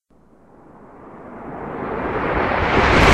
Fade In Sound Effect(1)
Here is a sound that created using "BRYANSMOSH's" sound and I mainly changed it because I caught a part in it that related to one of my first sounds.
Here is the link to the original:
And here is the link to my first version:
Film, Stop-Motion, Introduction, Fade-In, FX, Sci-Fi, Science-Fiction, Sound-Effect, BRYANSMOSH, Changed, Fade, Video-Game, Edit, Video, Intro, Movie